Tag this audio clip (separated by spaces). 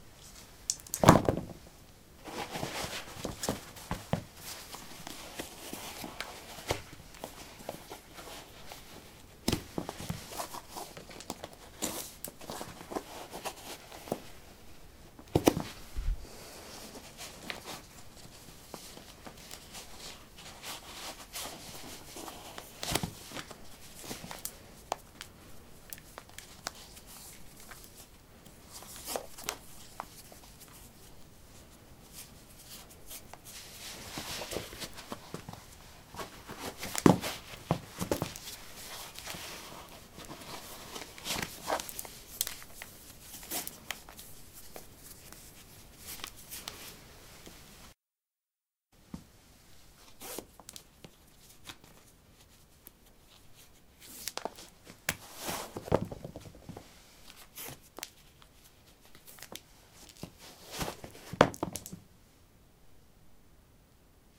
footstep footsteps step steps